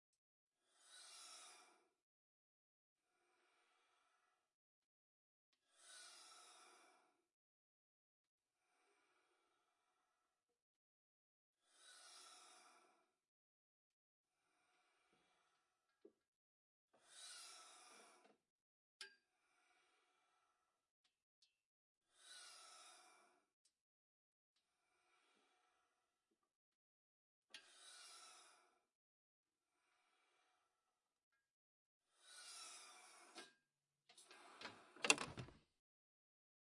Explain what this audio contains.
Not quite as fun as the title makes it sound but still a good hydraulic whooshing sound I made with my Tascam DR-40X on a small tripod underneath my bed as I lifted and pushed down on the hydraulic hinge. Filtered and EQ'd a bit for some room buzz.
Be chill and use my sounds for something most non-heinous.